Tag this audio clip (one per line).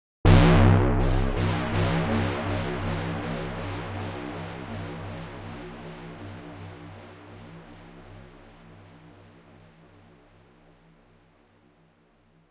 echo
energy
hit
sound-effect
synth